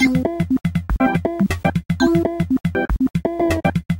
A really funny little loop. it's another remix of Kat's really old samples.